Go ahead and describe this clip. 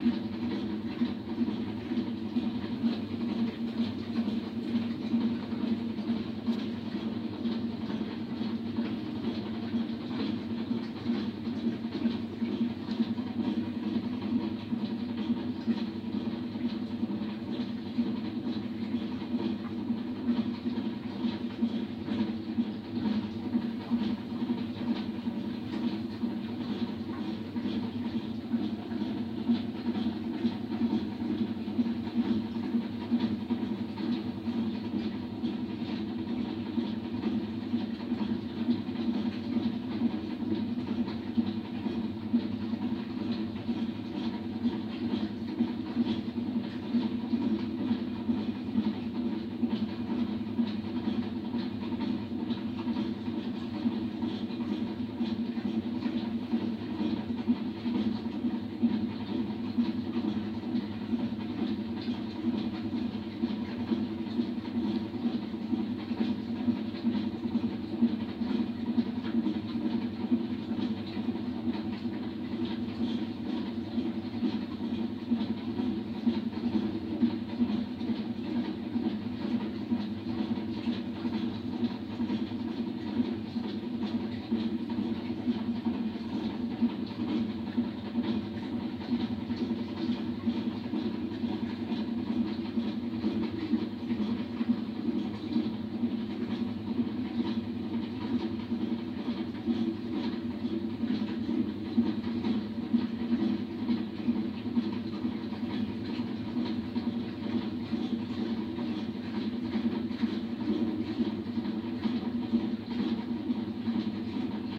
Laundromat Ambience
laundromat-ambience, ambience, OWI, room-with-machines